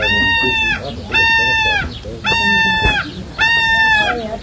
Sound of kea screaming. Recorded in New Zealand
birds, kea, NZ, parrot, screech, Zealand